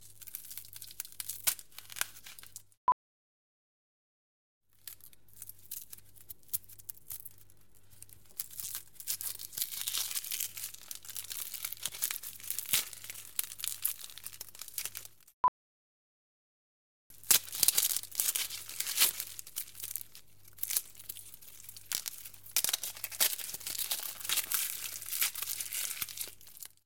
Peeling Onion
pack, catering, mcdonalds, stereo, ambience, food, fast, restraunt, field-recording